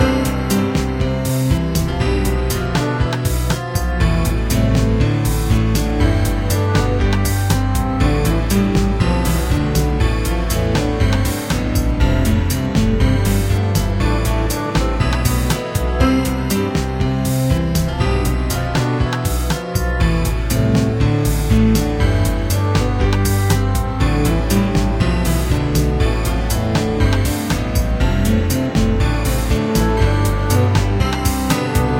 Short loops 14 03 2015 1
made in ableton live 9 lite - despite many crashes of ableton live 9 lite
;the program does not seem to work very well on my pc - luckily the program has
built in recovery for my midi projects after crashes occur.
- vst plugins : Balthor, Sympho, Alchemy, ToyOrgan, Sonatina Flute- Many are free VST Instruments from vstplanet !
bye
gameloop game music loop games organ piano sound melody tune synth ingame happy bells
music, piano, gameloop, tune, synth, games, melody, ingame, organ, game, happy, bells, sound, loop